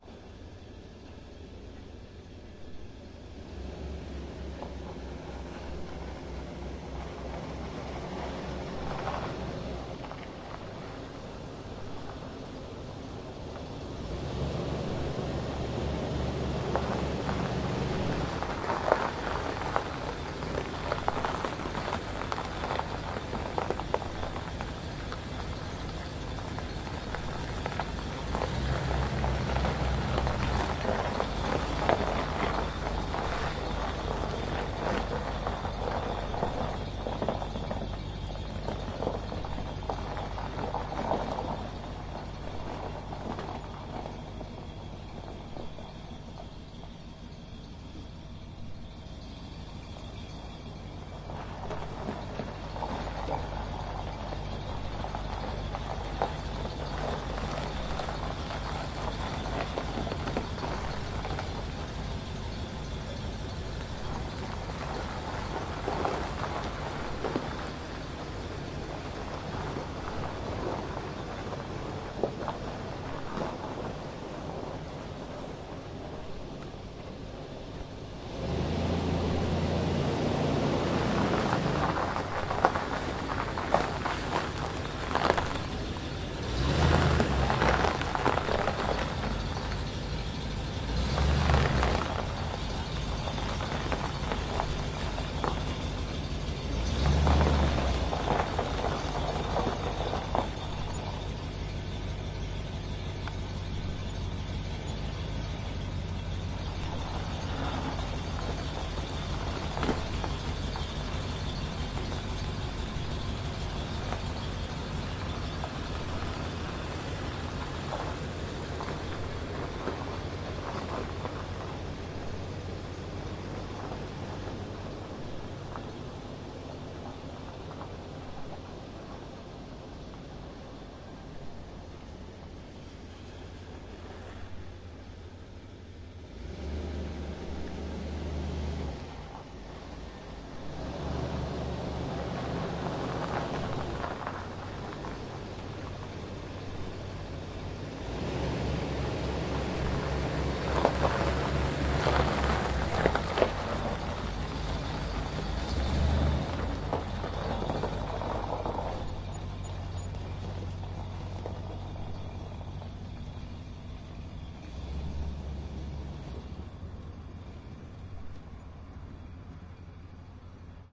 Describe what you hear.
1992 Ford Van driving on gravel. Various starts and stops.